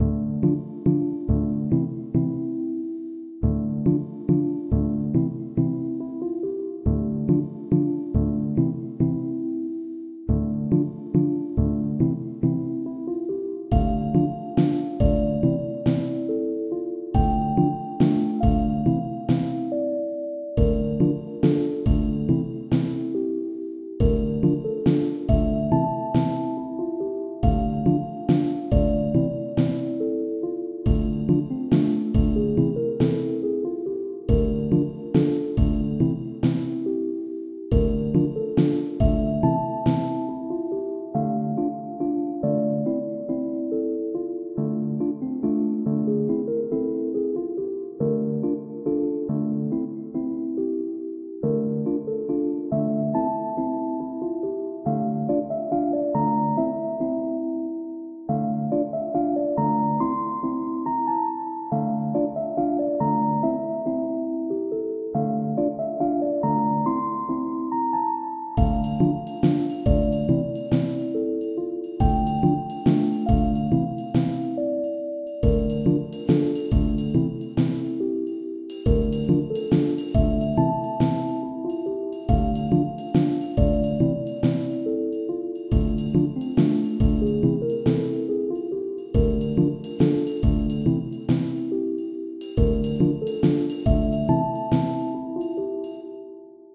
A simple slow melody
hut, ice, loop, sad, tune, village, winter